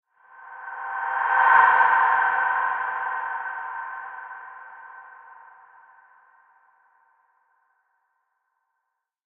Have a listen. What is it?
A reverb tail swell, pitched to a 5th.
[Key: Noise based]